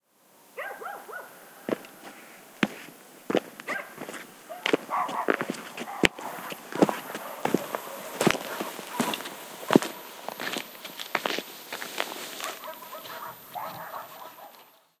20160327 01.steps.night.BP4025
Noise of steps approaching on dirt road, with dogs barking in background. Recorded at Bernabe country house (Cordoba, S Spain) using Audiotechnica BP4025 inside blimp, Shure FP24 preamp, PCM-M10 recorder.
dirt-road, field-recording, night, steps